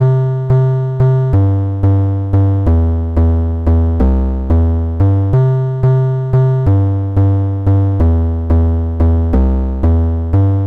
90 bpm ATTACK LOOP 3 square triangle melody mastered 16 bit
This is a component of a melodic drumloop created with the Waldorf Attack VSTi within Cubase SX.
I used the Analog kit 1 preset to create this loop, but I modified some
of the sounds. It has a melodic element in it. The key is C majeur. Tempo is 90 BPM.
Length is 1 measures and I added an additional 5 measures for the delay
tails. Mastering was done within Wavelab using TC and Elemental Audio
plugins.